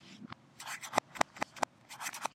Short, soft, clicks and scratches that have been reversed. Recorded using a Mac computer microphone.